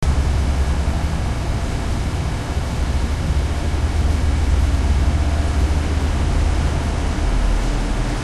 Sounds of the city and suburbs recorded with Olympus DS-40 with Sony ECMDS70P. Upper floors of parking garage.
city, street, field-recording